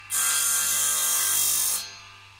circ saw-04
Distant circular saw sound.
saw, electric-tool, circular-saw